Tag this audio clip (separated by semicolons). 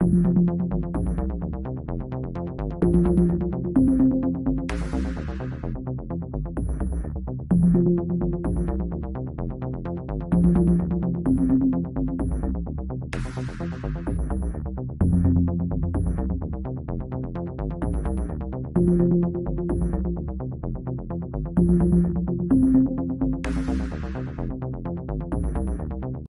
80s; dark; evil; future; loop; synth